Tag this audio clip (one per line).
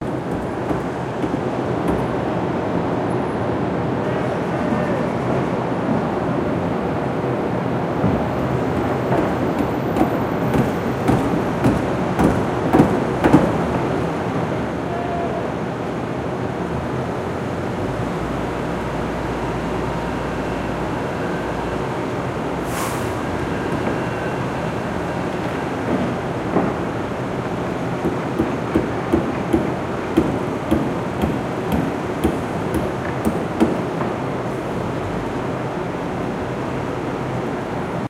building
construction
hammering